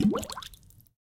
a water bubble made with air-filled bottle
under water of a sink
this one is bigger with some sparkling
recorded with sony MD recorder and stereo microphone